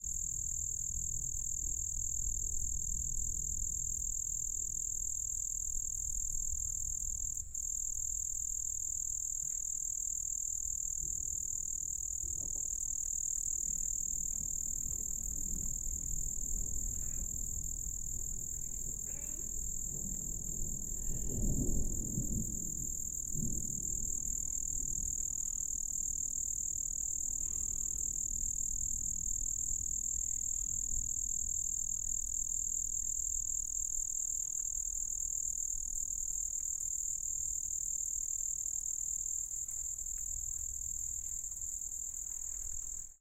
AMB Ghana Thunder, Crickets CU LB
Lite Thunder storm with close up crickets near Biakpa, Ghana
Africa, Ghana, Thunder